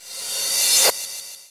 Reverse Cymbal
Cymbal, Drum-Machine, Reverse, SFX, WavePad